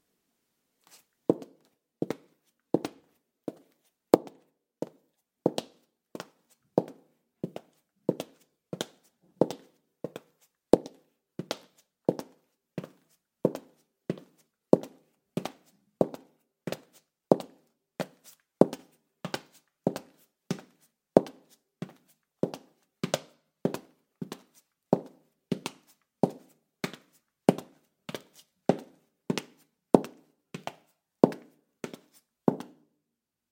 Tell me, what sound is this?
01-15 Footsteps, Tile, Female Heels, Slow Pace
Female in heels walking on tile
female, flats, footsteps, heels, kitchen, linoleum, tile, walking